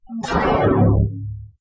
Like the cheerommmm, but longer and not as much contrast. I then removed the unbelievable noise to see what I ended up with. After that, I cut out the parts that sort of sounded cool and these are some of the ones I am willing to let everyone have.
alien, computer-generated, irregularly, short, stab, weird